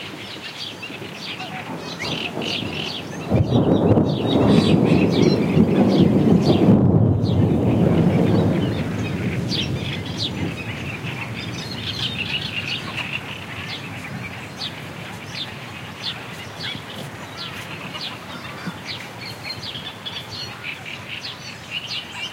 single thunder (a bit distorted, I'm afraid) with birds (House Sparrow, , Great Reed Warbler) calling in background. Sennheiser MKH 60 + MKH 30 into Shure FP24 preamp, Olympus LS10 recorder. Mixed to mid/side stereo with free Voxengo plugin.
marshes, donana, thunder, nature, storm, field-recording